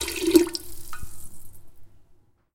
Pouring water (short version)
Pouring water in a metal cooking pot.
Recorded with Tascam DR-40X.
liquid, pouring, kitchen, water, cooking